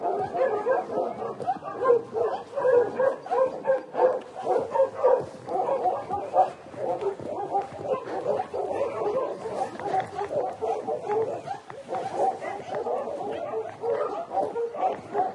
dogs barking close, and some noise from my clothing I coulnd't help making